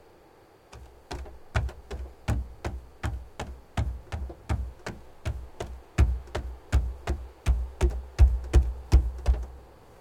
Running on wooden platform near the seaside version 4
Sound of a person running on a wooden platform. Ambient sounds which also can be heard are the ocean and crickets in the background.
Recorded on the Zoom F4 and Rode M5's
footsteps, running, walking